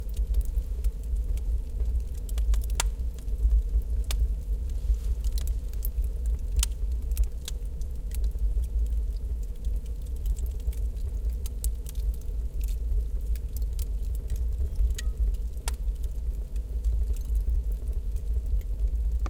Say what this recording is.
oheň kamna
fire, flames, flame, burning